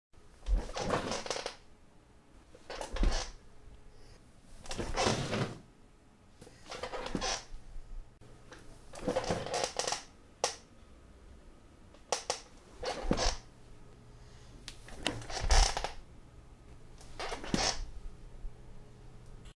Squeaky office chair
Some foley for sitting and getting up from an old office chair.
chair, office